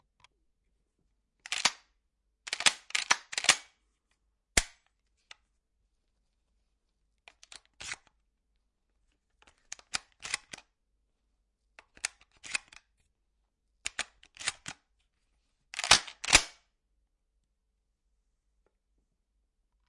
Pump Action Shotgun Reload
Dryfire, then reloading my Wheaterby PA08 Pump Action Shotgun. Recorded indoors
Always fun to hear where my recordings end up :)
12-gauge, ammo, ammunition, buckshot, cartridges, click, dryfire, firearm, gun, gunfight, indoors, load, pump-action, rack, reload, scattergun, shells, shot, shotgun, slugs, spring, Weapon